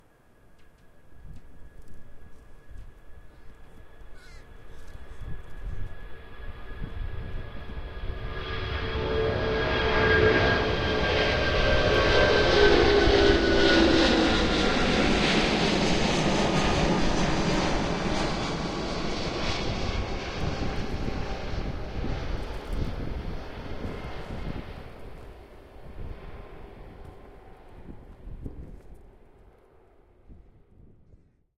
Airbus A340-500 takeoff near
Airbus A340-500 taking off; engine type is likely Rolls-Royce Trent 500.
aeroplane, aircraft, airplane, aviation, boeing, field-recording, flight, jet, plane, take-off, takeoff